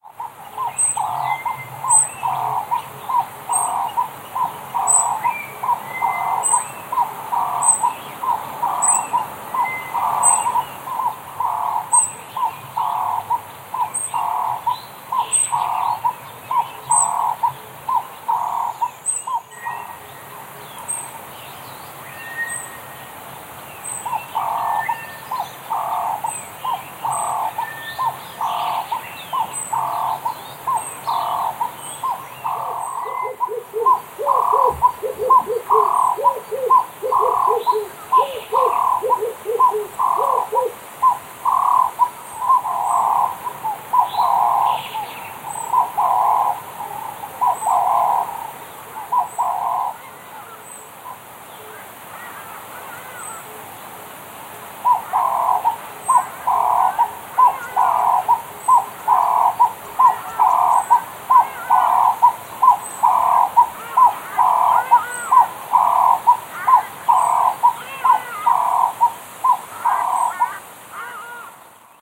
Bird Sounds of Knysna
Early morning bird calls, Knysna forest, featuring the turtle dove on solo, the Hadeda on horns, the sunbird on backing vocals, and a choir of nameless others
Recorded in Knysna forest, South Africa, using a Behringer C1 mic with Audiobox sound card interface. Light compression, amplification and EQ on Studio One software.
bird, bird-calls, birds, bird-sounds, forest, nature, nature-sounds, turtle-dove